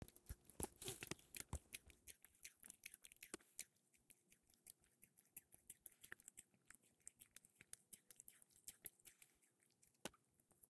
My cat eating a packet of standard wet food. No animals were harmed in the making of this audio.